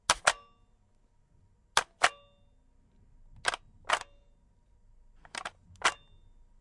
Nerf Roughcut Trigger Pressing Sound